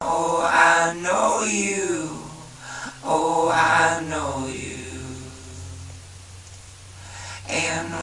I KNOW YOU Vocals
A collection of samples/loops intended for personal and commercial music production. All compositions where written and performed by Chris S. Bacon on Home Sick Recordings. Take things, shake things, make things.
piano, indie, loop, whistle, harmony, beat, melody, sounds, loops, acapella, drums, looping, bass, guitar, Indie-folk, rock, samples, drum-beat, acoustic-guitar, percussion, free, original-music, vocal-loops, synth, voice, Folk